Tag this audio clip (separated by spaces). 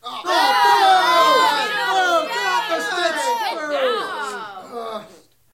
booing theatre crowd boo studio group audience theater